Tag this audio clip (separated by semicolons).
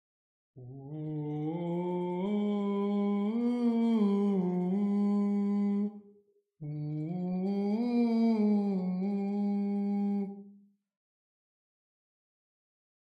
chanting; church; various